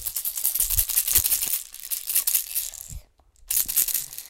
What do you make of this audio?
baby shaker
SonicSnaps, Germany, January2013, Essen